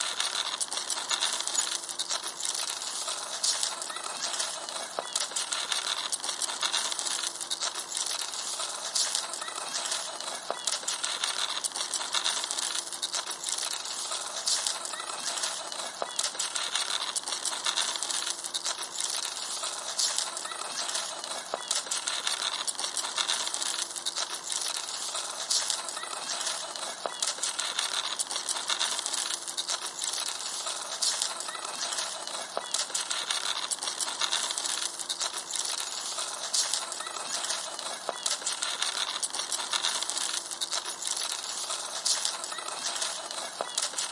scrapy autmun walk loop